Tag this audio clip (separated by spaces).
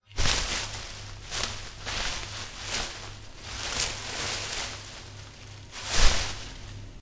bush bushes noise shrubbery